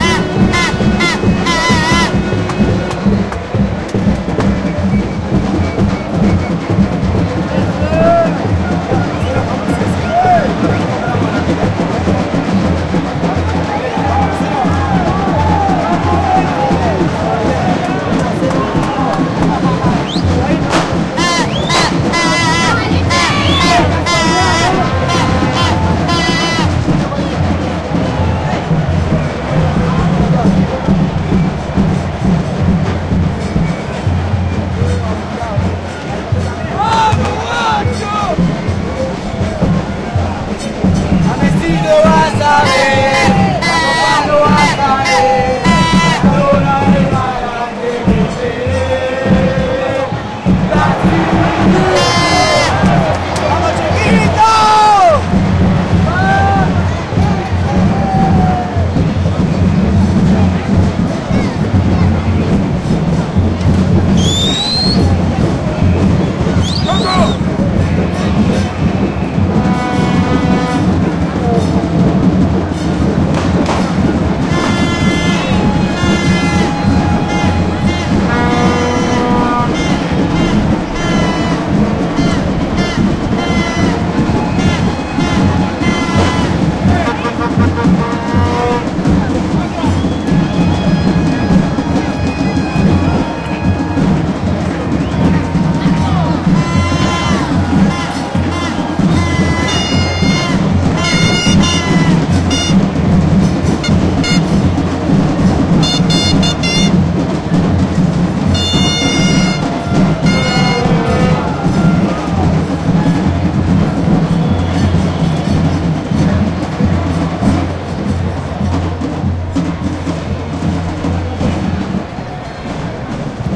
people shout in the streets of Buenos Aires